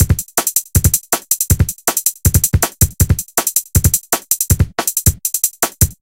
SMG Loop Drum Kit 1 Mixed 160 BPM 0098
drumloop, 160-BPM